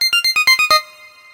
Game Sounds 1
You may use these sounds freely if
you think they're usefull.
(they are very easy to make in nanostudio)
I edited the mixdown afterwards with oceanaudio.
33 sounds (* 2)
2 Packs the same sounds (33 Wavs) but with another Eden Synth
19-02-2014
effects, sound, game, effect